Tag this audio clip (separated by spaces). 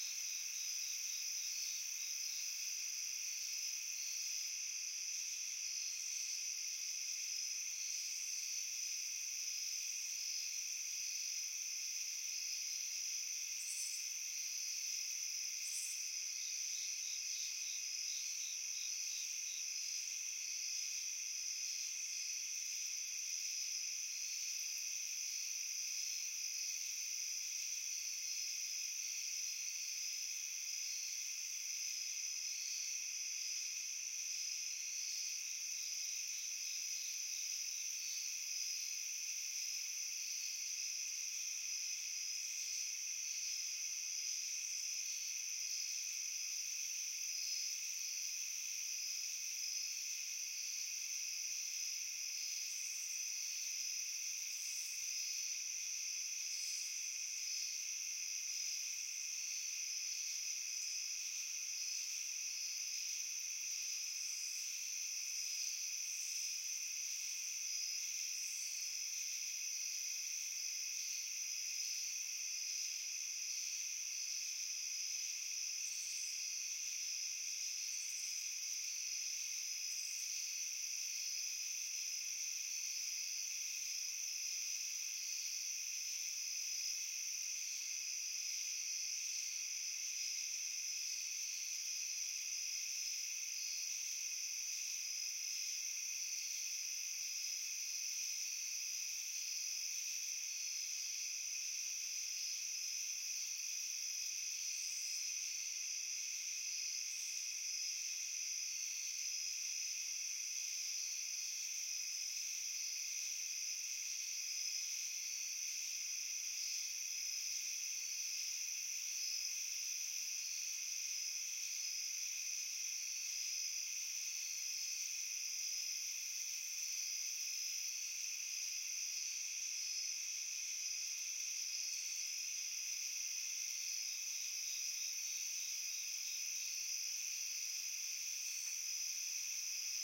Atmosphere Crickets Frogs Night-Sounds Soundscape